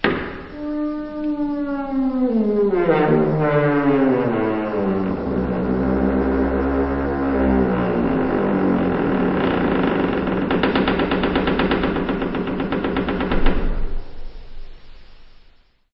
Door creaking 02 2
hinge, close, door, wood, wooden, open, rusty